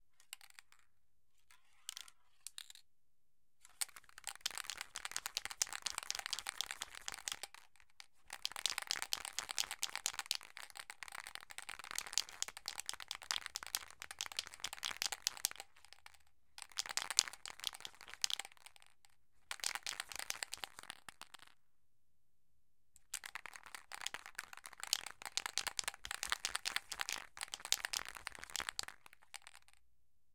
Shaking paint spray balloon 3
Shaking paint spray balloon. Knocking of the ball inside 400ml metal balloon with paint.
Recorder: Tascam DR-40
aerosol, ball, balloon, knock, knocking, knocks, paint, shake, shaking, spray